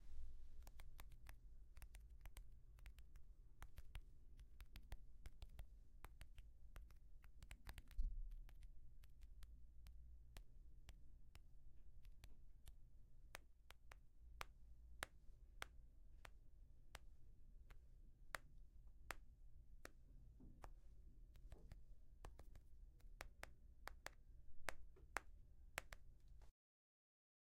53-Interacting With The Cellphone Screen
Interacting With The Cellphone Screen
Interacting, Screen, Cellphone